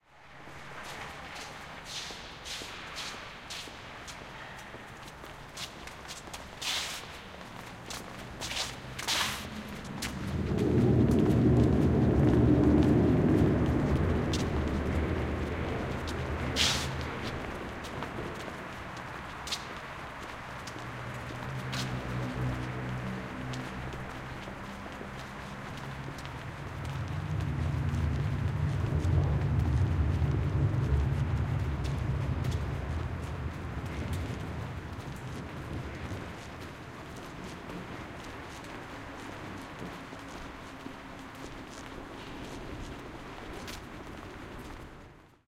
hi-fi szczepin 01092013 below Legnicka street
01.09.2013: fieldrecording made during Hi-fi Szczepin. Performative sound workshop which I conducted for Contemporary Museum in Wroclaw. Sound of steps in underpass below Legnicka street in district Szczepin in Wroclaw. Recording made by one of workshop participant.
field-recording, Poland, Szczepin, underpass, Wroclaw